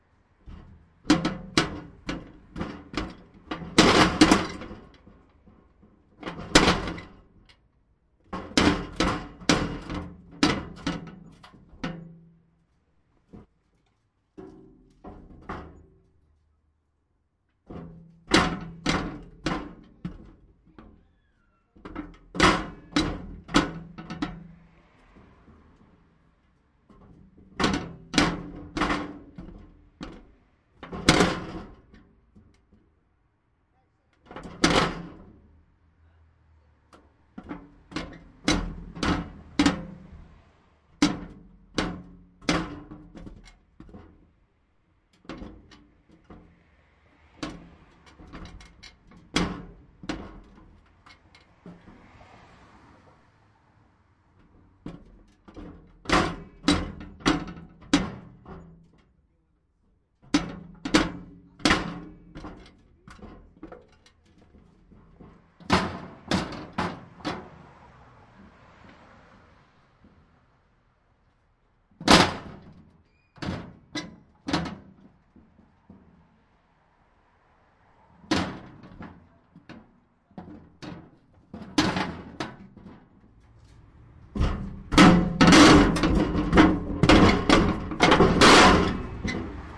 metal thing kicking hits thud rattle harder end
thing, rattle, kicking, thud, metal, hits